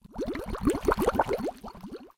Acid bubbling up.
Acid, Bubble